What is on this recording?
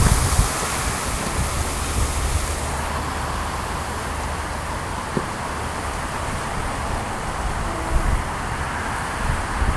Sounds recorded while creating impulse responses with the DS-40.
ambiance, field-recording